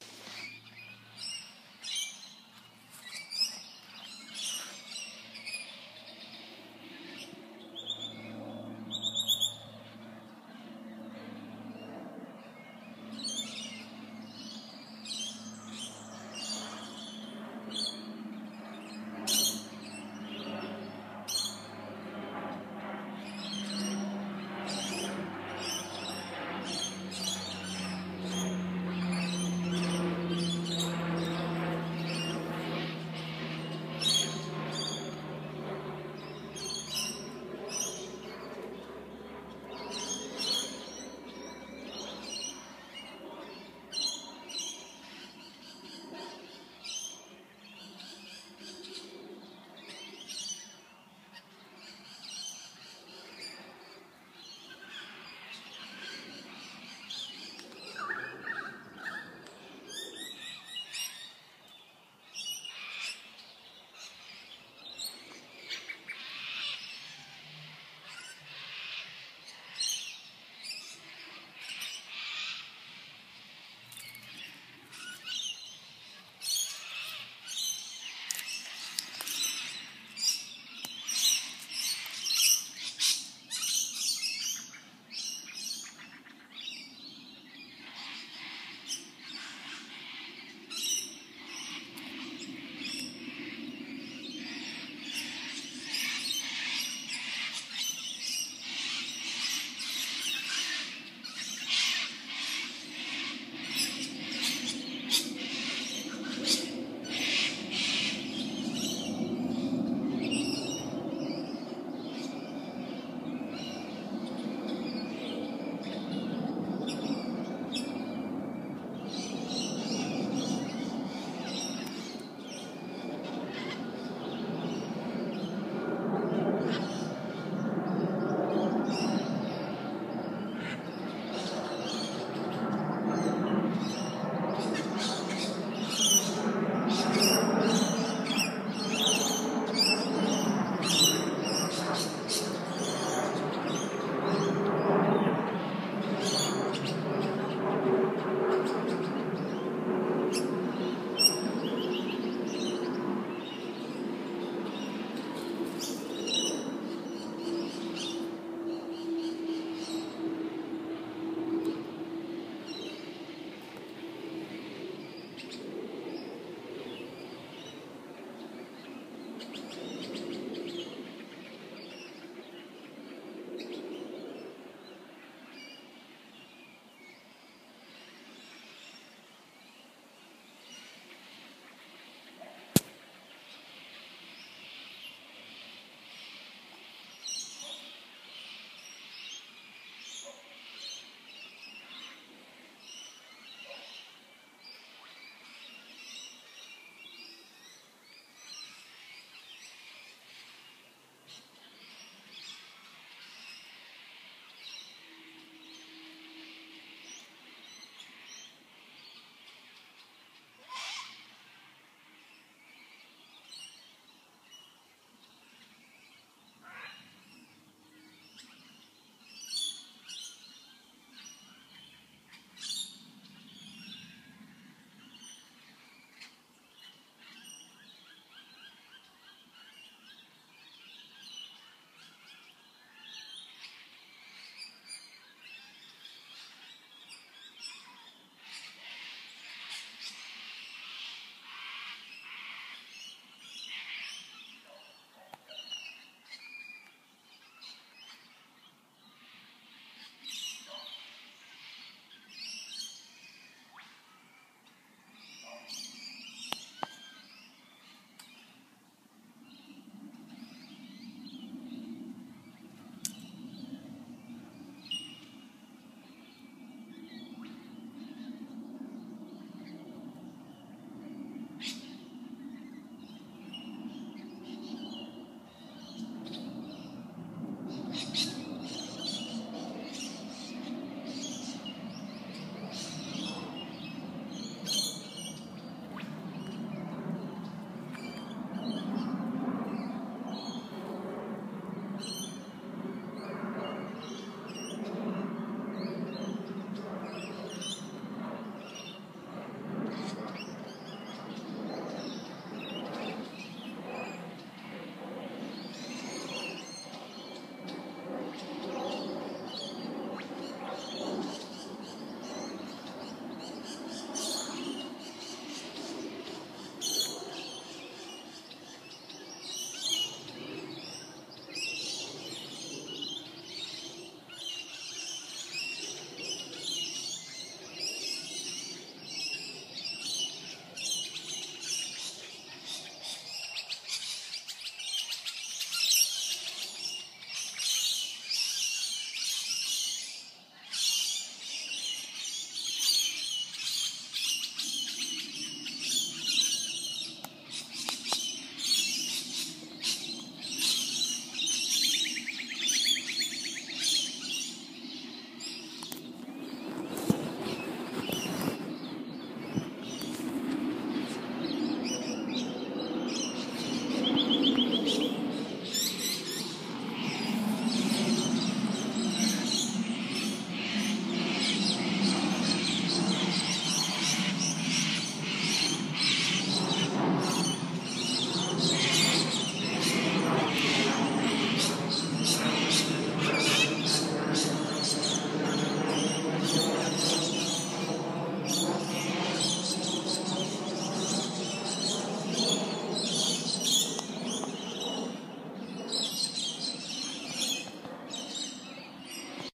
A sound of bird tweets and chirps.
Bird, Chirp, Tweet